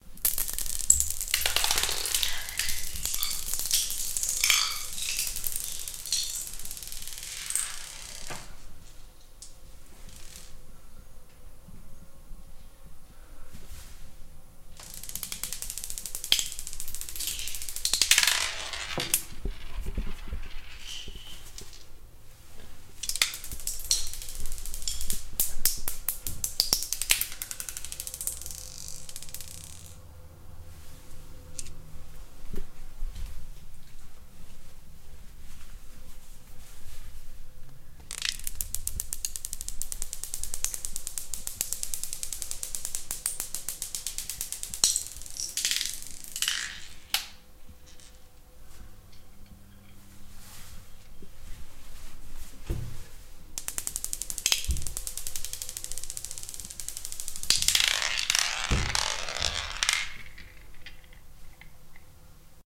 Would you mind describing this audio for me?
Rolling of marbles on tile floor